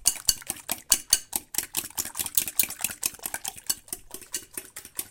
mixing omelette
Mixing some omelette in a dish with a fork.
Recorded by Sony Xperia C5305.
kitchen, cooking, omelette, mixing, house-recording